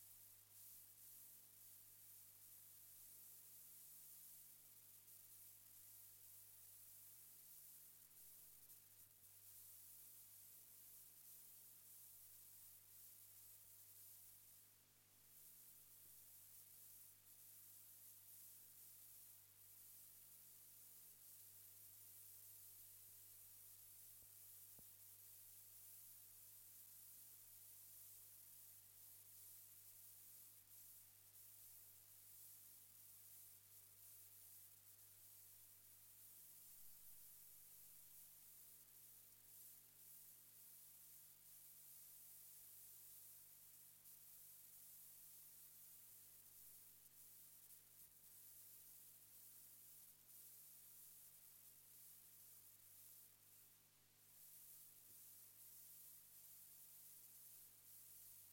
RE-201 Noise Mode 1
Self noise + tape hiss from my Roland RE-201 Space Echo.
Repeat mode 1.
hiss, noise, re-201, space-echo